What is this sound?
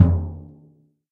Slingerland70sBopKitKickBD20x14
Toms and kicks recorded in stereo from a variety of kits.
acoustic, drums